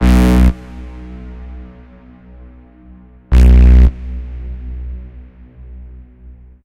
Reker Bass Stabs
Two octaves of a thick heavy bass stab with reverb.